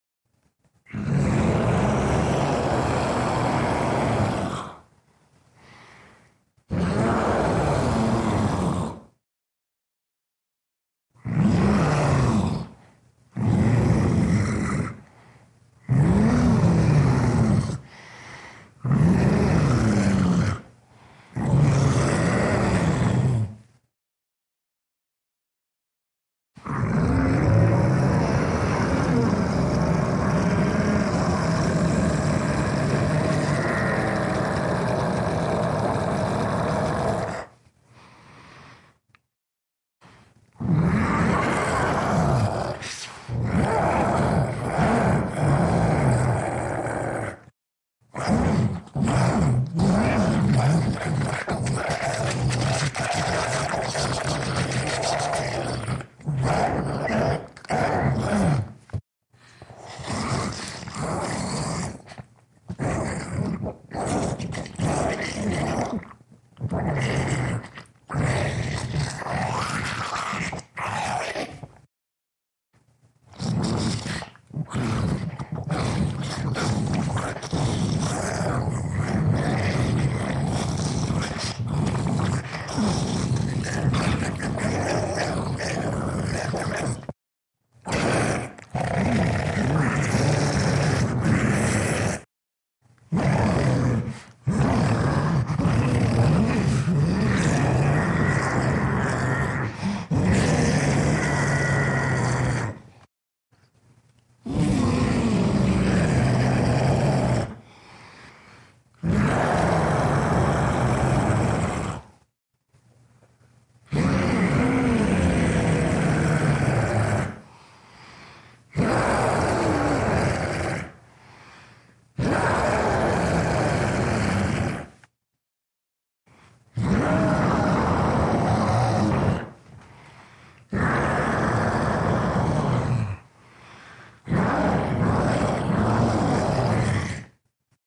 Zombie/Monster roars, growls, grunts, devouring sounds (juicy)
Recorded with a decent mic and a cup of tea. Combination of sound filters applied. Collection of Zombi/Zombie/Monster roars, growls, grunts, and devouring sounds in one file. Just choose the parts you need. Check out the less "juicy" version, which sounds a bit dryer, with less throat gurgling, and simpler.
Scary, Creature, Scream, Roar, Zombie, Growl, Splatter, Monster, Horror